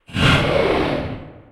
Monster Cry
Growl sound with a small reverbation. You can use it for monsters, zombies, alien invaders and lions.
creature, echo, horror, monster, roar, solo, undead, zombie